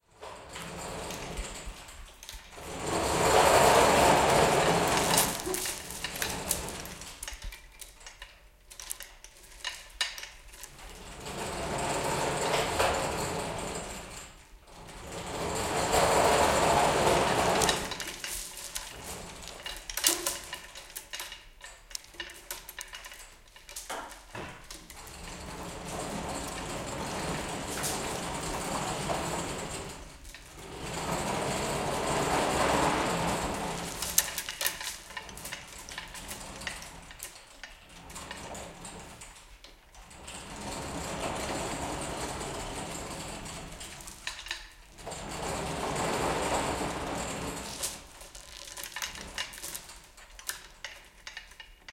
metal shop hoist chains thick rattle pull on track
chains, hoist, metal, pull, rattle, shop, thick, track